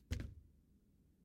Light Thud 3
Recorded on a Tascam DR-100 using a Rode NTG2 shotgun mic.
Versatile light thud for subtle sound effects.